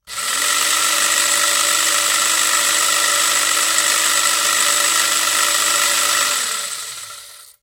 Hedge Trimmers Run
electric, industrial, machine